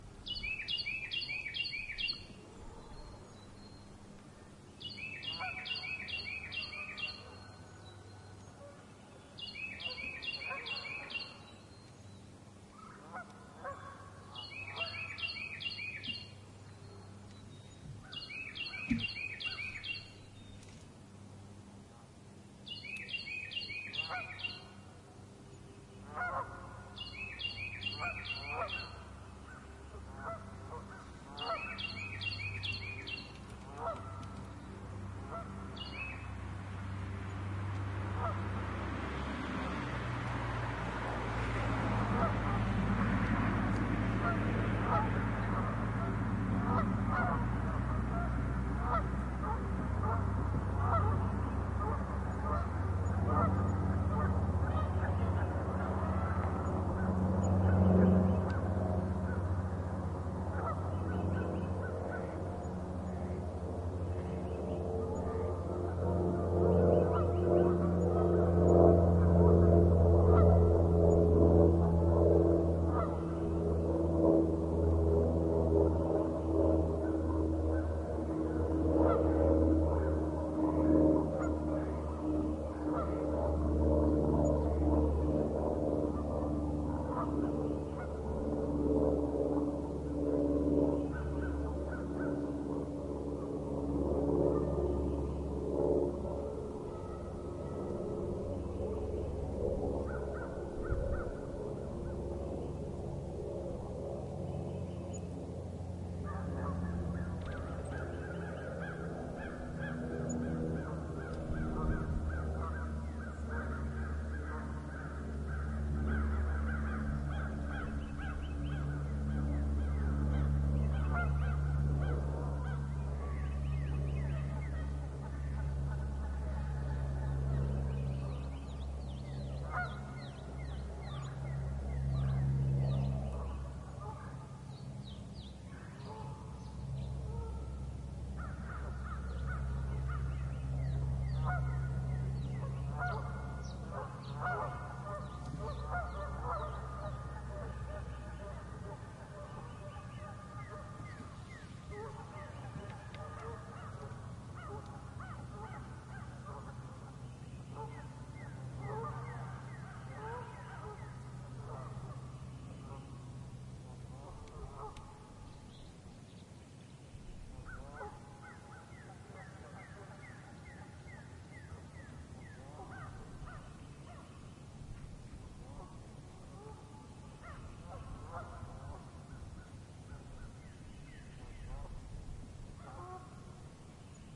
Ben Shewmaker - Griffey Park Bird n' Plane
Recorded at my local park, you hear some birds, followed by a plane flying overhead, then some more birds.
field-recording, plane